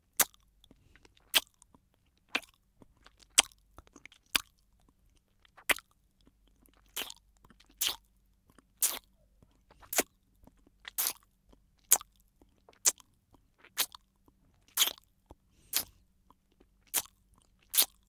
Lollipop Licking
Lollipop: licking & sucking of a lollipop.
candy, eat, food, human, lick, lollipop, tongue